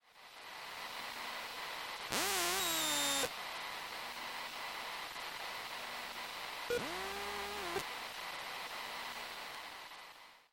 PGJ TelPickupCoil Recording Raw.07 CDROM
In the spirit of the jam, as a thank you for the chance to be a part of such an awesome event and to meet new incredibly talented people, I decided to give away for free some samples of recording I did of electric current and some final SFX that were used in the game. I hope you find these useful!
This is raw sample of a PC CD ROM I recorded with a telephone pickup coil, during the Prometheus Game Jam of 2017 in the town of Lavrio. This was heavily processed for our game "Breach" for various SFX.
electric-current, electricity, hum, noise, telephone-pickup-coil